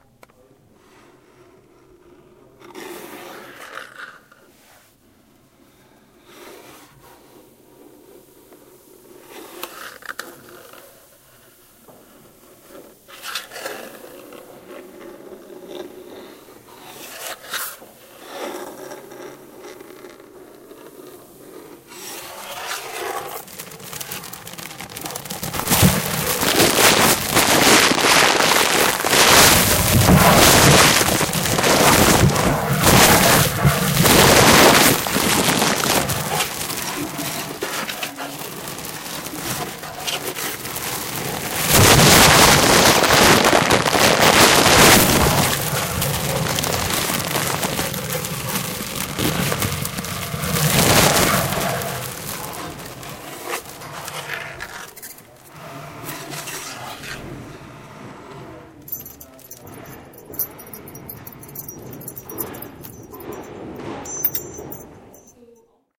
nathalie&esther
This is a result of a workshop we did in which we asked students to provide a self-made soundtrack to a picture of an "objet trouvé".
bruitage, field-recording, workshop